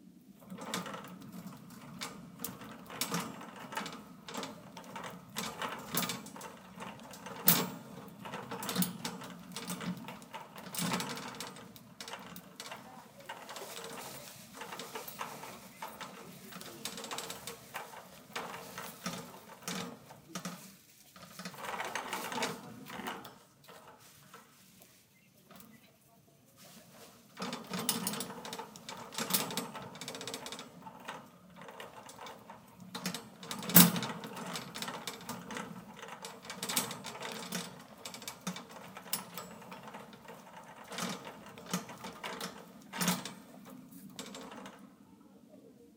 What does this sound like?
Shopping cart - carriage, medium speed
Shopping cart basket being pushed at medium speed